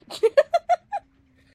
a happy woman giggling